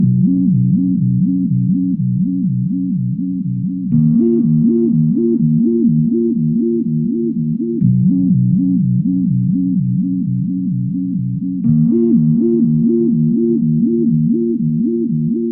Flying in a plane looking at clouds filled with lightning.